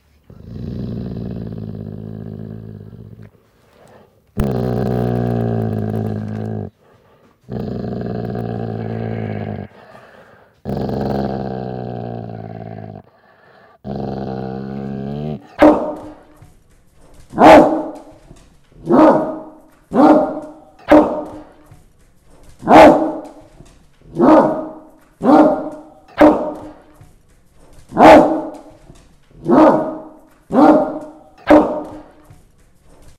Pitt Bull Dog Bark
pitt, bark, dog, bull, community, college